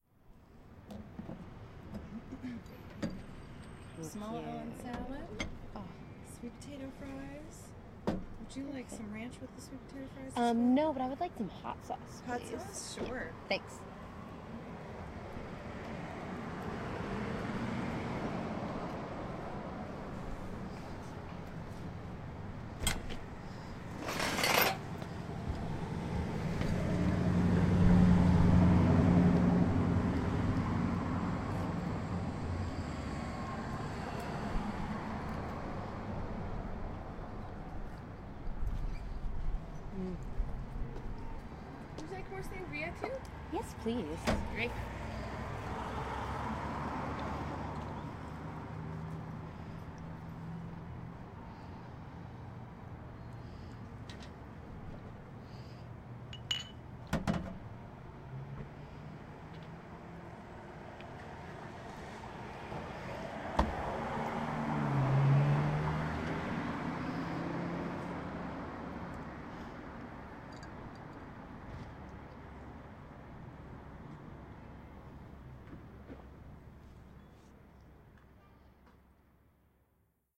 GettingFood SammyT's Feb2012
Getting my food, traffic in the background. From a recording I made with my ZoomH2 sitting at an outdoor table at a restaurant called Sammy Ts.
restaurant, female-voices, food-order, traffic